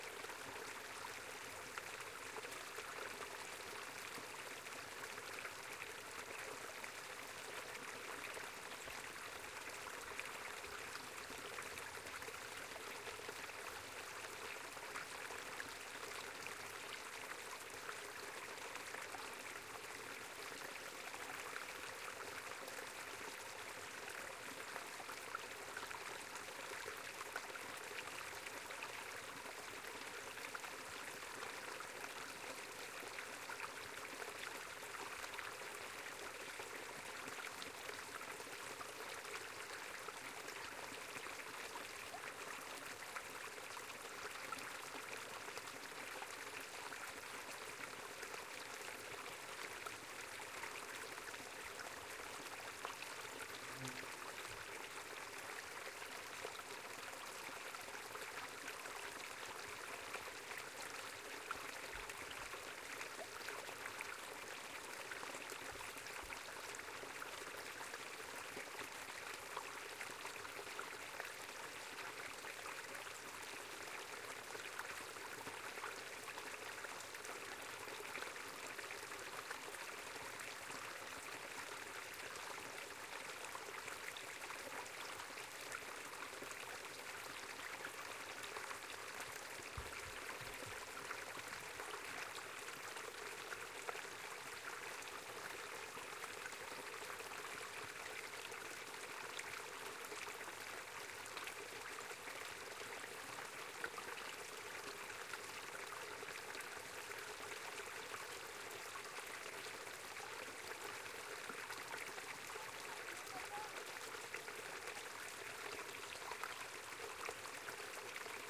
small stream at a closer perspective, recorded with two AKG 480 omni with a jecklin disk.